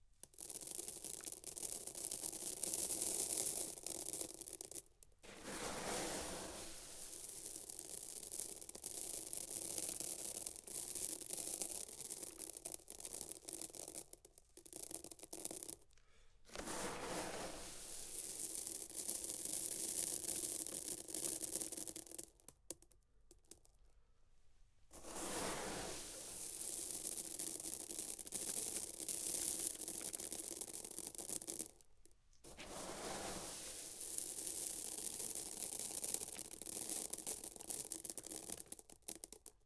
gravel falling into foley pit